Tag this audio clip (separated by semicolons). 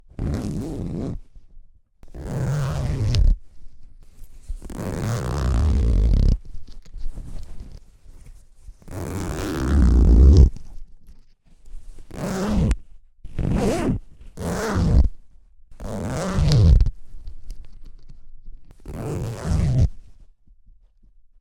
close-up; Foley; onesoundperday2018; zipper